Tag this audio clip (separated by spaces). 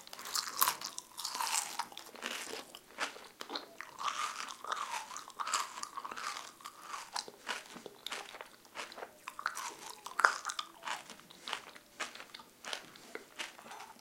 chewing corn-flakes crunchy